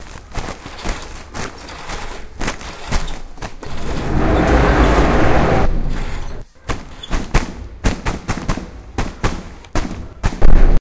SonicPostcard CCSP YerayGerard
Cancladellas, January2013, sondebarcelona, SonicPostcard